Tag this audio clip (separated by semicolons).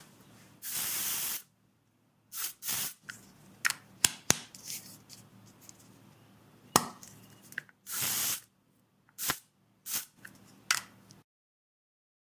Spray; OWI; Deodorant